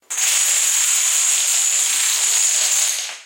This sound effect was created with a long line of dominos being knocked over. The sound was recorded with the in-camera microphone on a Sony A7S-II. It is easy to loop; if you trim the beginning and end of the clip, the sound will flow seamlessly creating the illusion there are more dominos falling than in actuality.
Dominos, Falling, Loopable